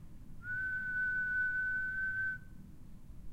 Whistle 1 Medium
Whistle average pitch
average, pitch, Whistle